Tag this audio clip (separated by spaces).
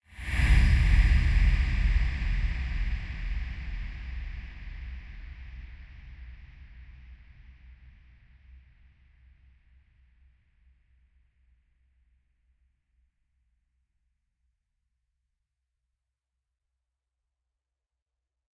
action awesome budget cinema cinematic deep design dope epic film free hit horror impact low low-budget mind-blowing movie orchestral raiser scary sound sub suspense swoosh thrilling trailer whoosh